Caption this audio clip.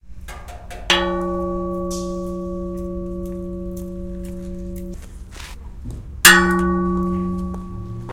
The sound of hitting a large, hollow metal column and allowing it to vibrate at the Box Shop art studio in San Francisco.